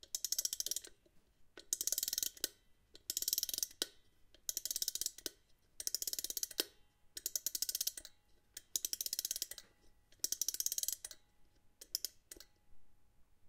clock / music-box spring mechanism wind-up
clock / music box spring mechanism wind-up.
Use and abuse this sound for free.
clock, mechanics, mechanism, music-box, spring, toy, winding-up, wind-up